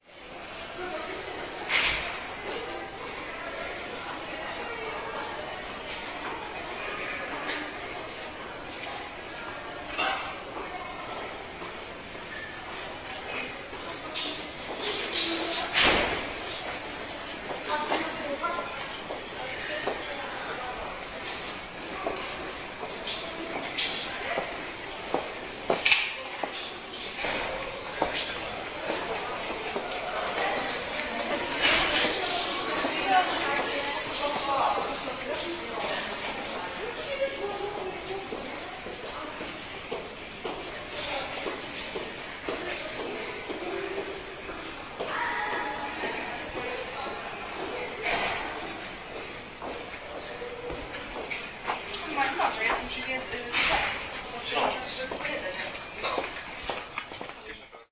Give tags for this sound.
echo; field-recording; staircase; steps; voices

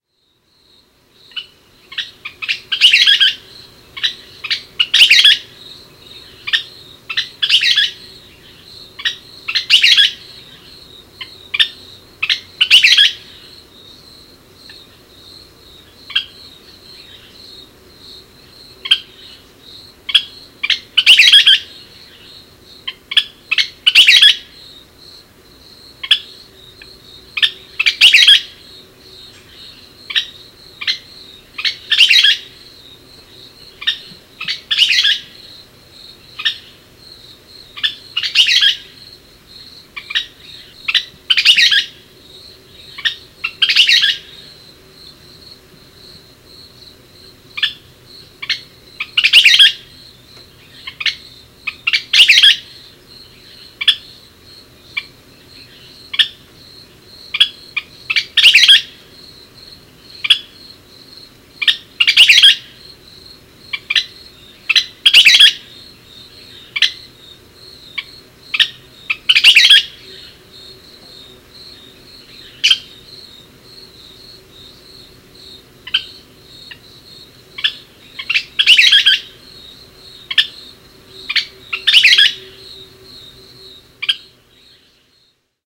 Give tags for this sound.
tyrannus-verticalis; western-kingbird

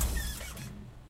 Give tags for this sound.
Buzz; electric; engine; Factory; high; Industrial; low; machine; Machinery; Mechanical; medium; motor; Rev

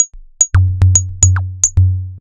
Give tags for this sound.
110-bpm; fm

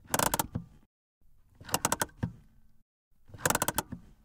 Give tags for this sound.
114i; automobile; bmw; car; effect; engine; foley; gear; handbrake; interior; lever; mechanic; mechanical; pull; sfx; sound; vehicle

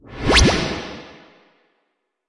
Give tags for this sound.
blip; speed

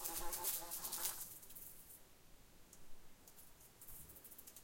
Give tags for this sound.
fly; buzz; window

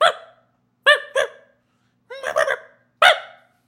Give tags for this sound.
animal; dog; yip

